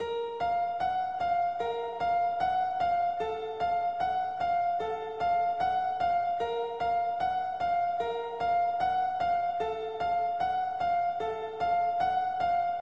This is a simply base of a piano melody. Only piano synth is used with reverb.